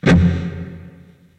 Right hand muted power chords through zoom processor direct to record producer.